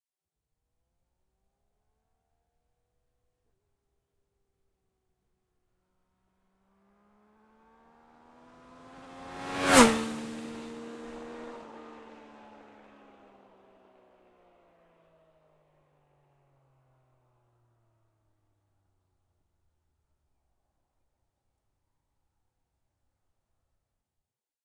Three cylinder motorcycle flyby
Yamaha MT-09 (FZ-09) flyby on race track - no other bikes running.
motorcycle, triple